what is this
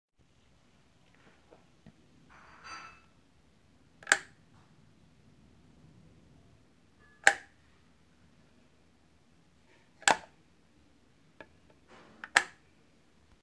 sonido de luz encendida